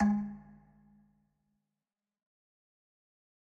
Metal Timbale 017
drum, pack, kit, god